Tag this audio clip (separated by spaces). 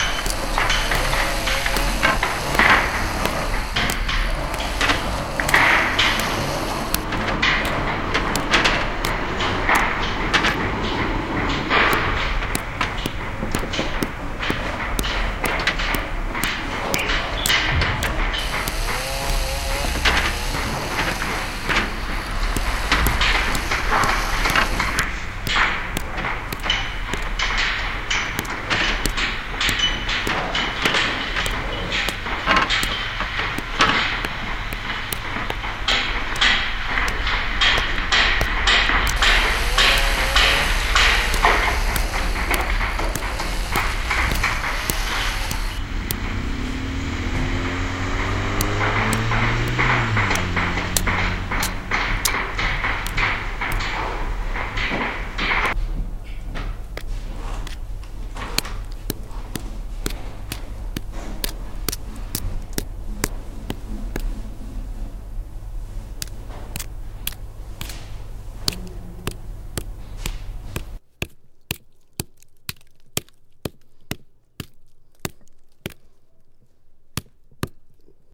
construction work tools